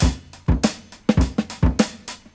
Oktoberclub Drumloop
Nice drums / breakbeat.